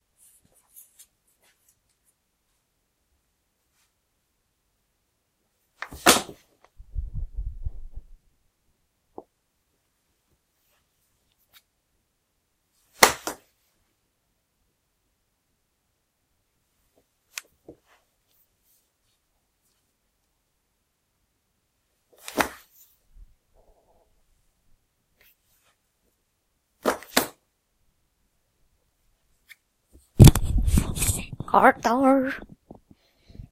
Just when I fall on the floor...
fall, falling, floor